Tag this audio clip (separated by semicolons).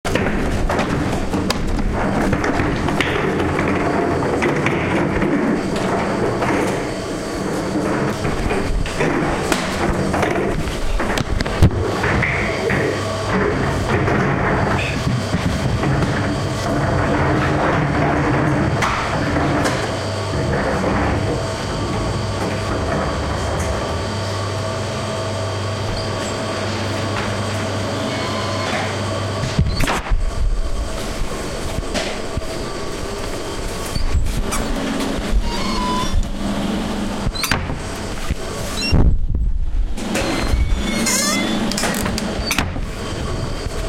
industrial; design; sound